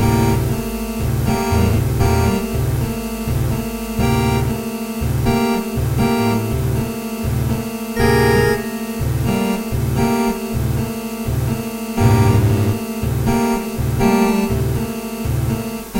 organ ic
Lo-fi recording with organ
noise, organ